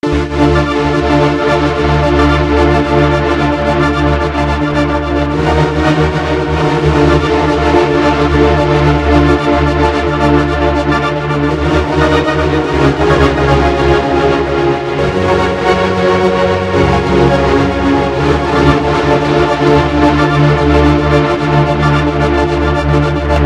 the last days of the earth v1 sample by kk
hope u like it :D did it on keyboard on ableton
creepy, dramatic, film, sad, spooky, terrifying, terror